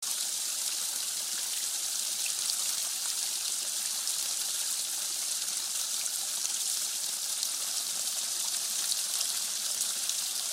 Small stream, fast rippling water in the woods summertime